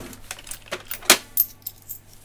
cashmachine 02 small market
Atmo in small market
Recorded on ZOOM H4N